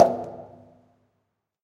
Recordings of different percussive sounds from abandoned small wave power plant. Tascam DR-100.
ambient, drum, field-recording, fx, hit, industrial, metal, percussion